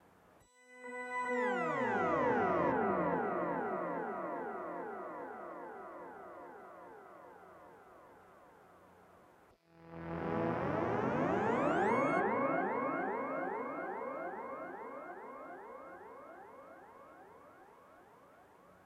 DRONE AND SPACE SOUNDS STYLOPHONE GEN X 03
Different sounds I got with different guitar pedals plugged in.
Gear used--
Soundsource:
SYLOPHONE GEX X 1
Pedals:
EHX Attack Decay
Zvex Lofi Junkie
Earthquaker Devices Space Spiral
EHX Nano POG
Recording:
Yamaha MG12/4
Focusrite Scarlet 2i2
artificial, drone, space